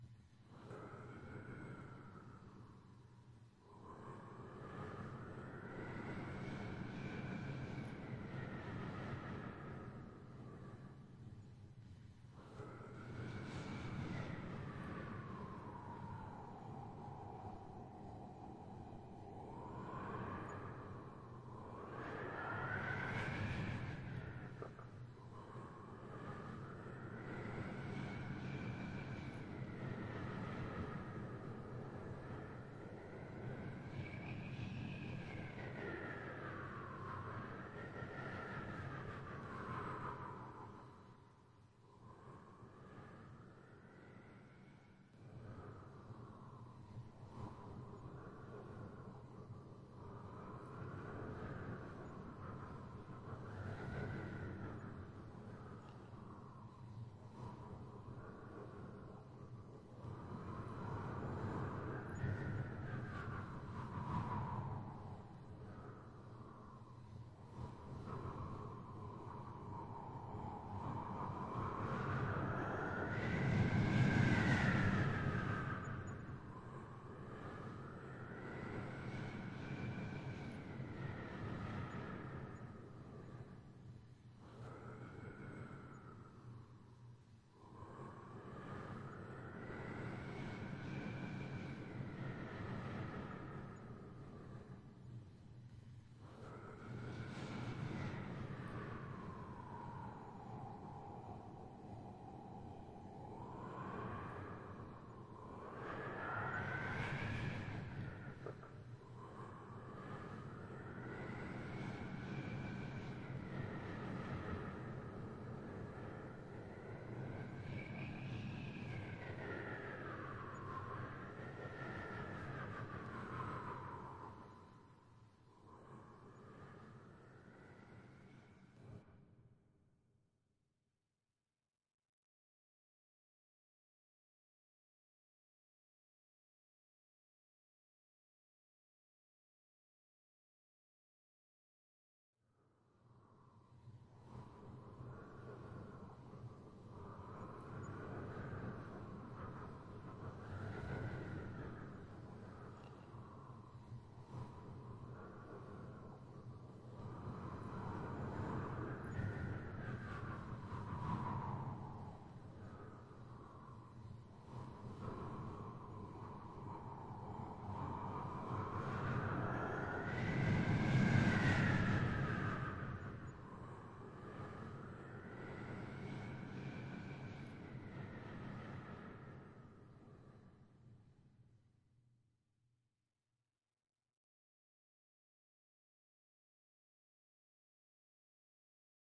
whistling wind polished
A doctored vocal imitation of a cold howling wind. Recorded using a Blue Yeti USB microphone. includes reverb and light stereo panning effects
gust, mouth, reverb, sound-effect, whistling, windy